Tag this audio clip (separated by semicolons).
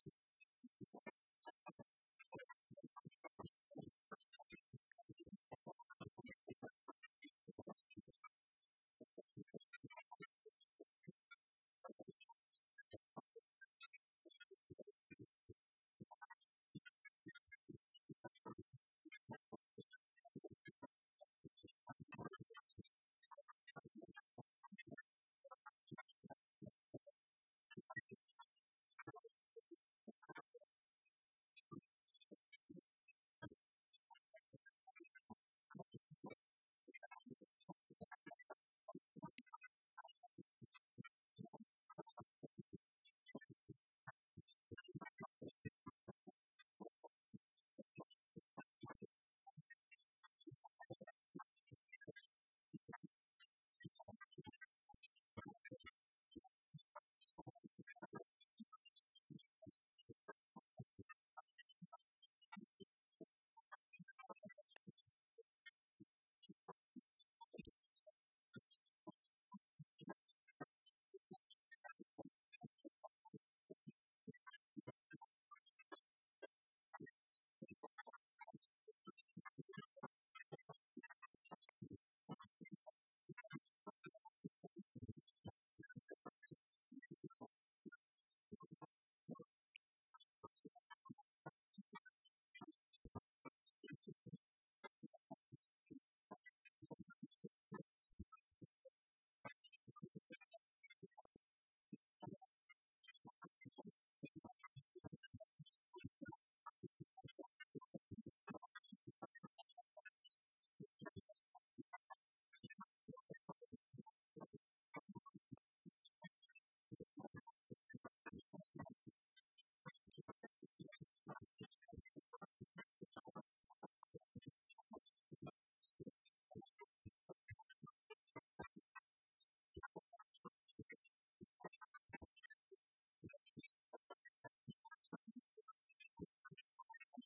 Operation; Hum; machinery; POWER; mechanical; MOTOR; machine; industrial